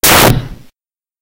glitch hard kick
some nice sounds created with raw data importing in audacity
audacity, electronic, experimental, freaky, glitch, noise, raw-data, strange, weird